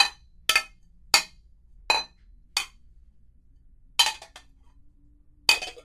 31-pateando-platos
This is a foley of plates clinking it was done by clinking plates, this foley is for a college project.
crash, chaotic, foley, plates, clatter